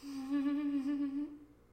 ghostly giggle
haunted, paranormal, giggle, creepy, phantom, haunting, sinister, ghost, horror, ghostly, spooky